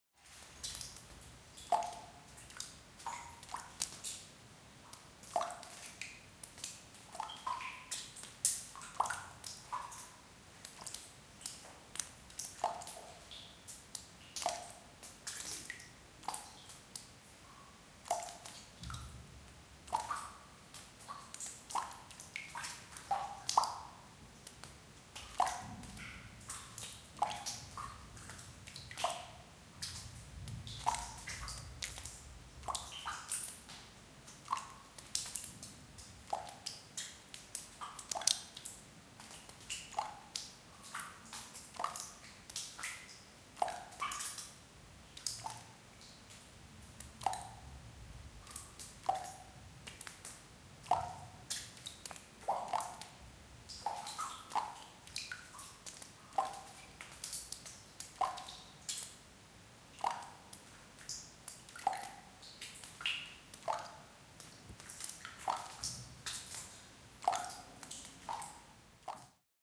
Water drops in crypt - Arles
Recording of water drops in an old roman crypt in Arles, Provence-Alpes-Côte d'Azur, France.
Recorded with my mobile phone with a Shure mv88 on August 2015.
crypt, water, drops, ambience, drop, shure-mv88, drip, dripping